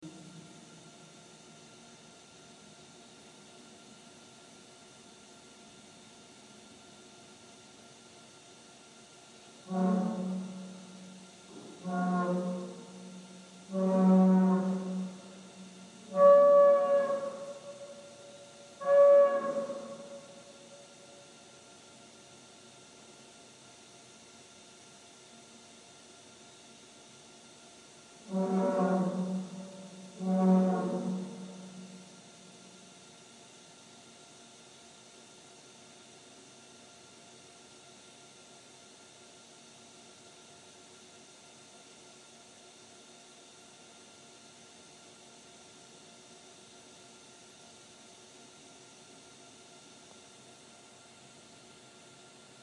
Strangely creepy industrial sound
Industrial sound, very horn like and creepy. A haunted radiator.
haunting, acoustic, vibrating, pipe, Industrial, container